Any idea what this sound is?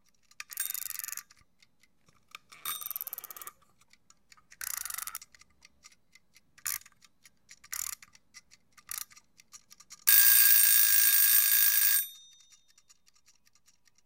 Egg timer - three long turns, three short turns, alarm on egg timer goes off. Timer ticking occurs between turns.
Neumann TLM103 through Yamaha MG10/2 Mixer and RNC1773 Compressor into computer.